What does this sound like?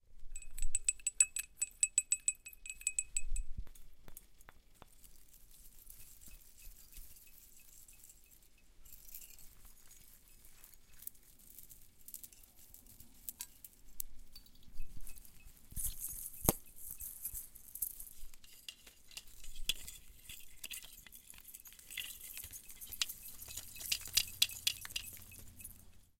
Small rattling sounds - Christmas ornaments
Various Christmas ornaments tinkling and rattling on a Christmas tree. Recorded in stereo using a Zoom H6.
ornaments, ornament, christmas, field-recording, AudioDramaHub, tinkling